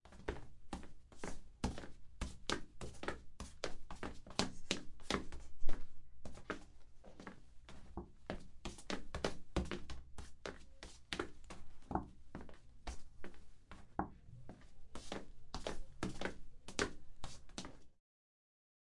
Moving Soccer Ball with Shoe in Concrete